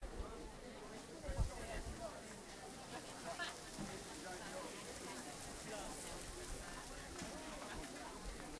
4 Sizzling meat
Sizzling meat at a food stall